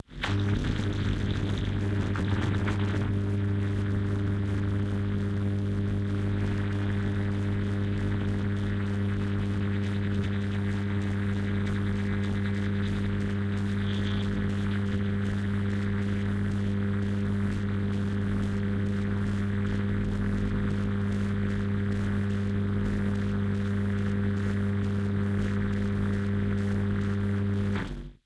Electric Sci-Fi Generator
On-running-off sound of en electrical sphere or generator.
There is no limit to imagination!
electric, fi, field, generator, humm, magic, off, sci, science-fiction, sci-fi, scifi, sphere